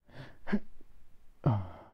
37-Intento de hablar ascensor
animation, foley, office